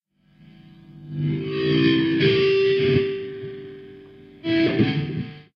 Two short burst of feedback with a third descending out. This sample was generated with a Gibson SG and a VOX AC-30 amplifier. It was recorded using two microphones (a Shure SM-58 and an AKG), one positioned directly in front of the left speaker and the other in front of the right. A substantial amount of bleed was inevitable!